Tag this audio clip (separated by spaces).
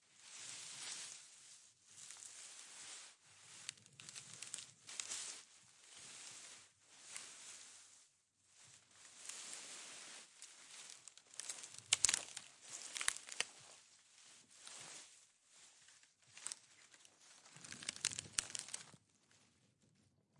foliage grass herb leaf leaves rustle rustling tree